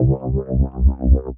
174 Sec.Lab NeuroSynth C1 B
Neuro Bass by Sec.Lab